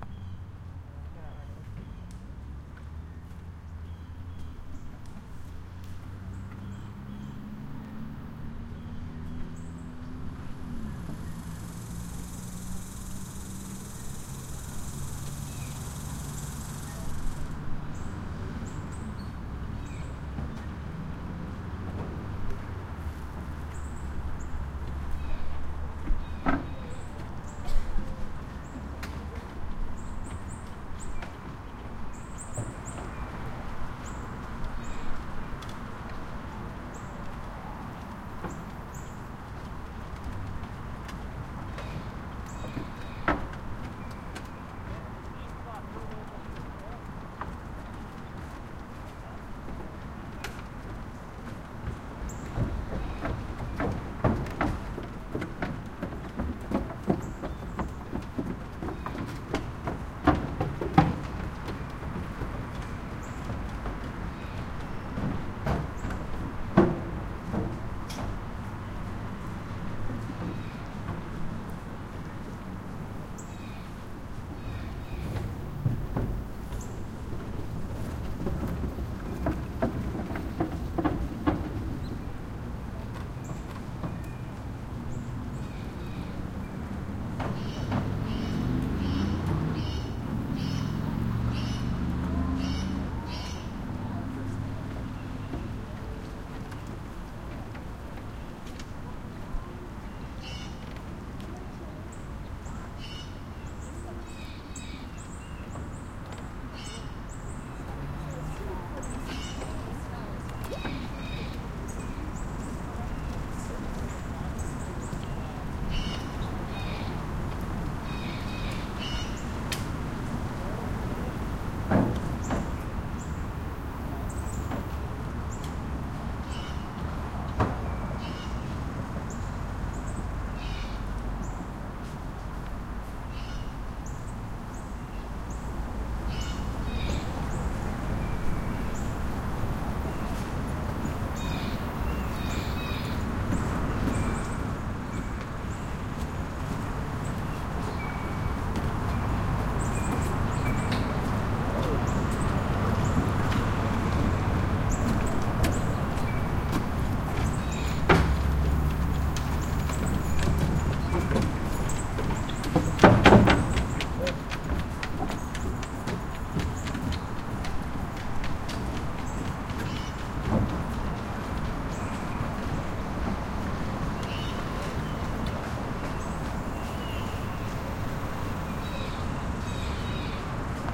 under footbridge near barton springs 08232013
sitting in a canoe underneath the footbridge just downstream from barton springs around dusk